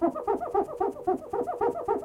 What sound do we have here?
window squeek
sound of scrubbing a window.
household, window, cleaning, glass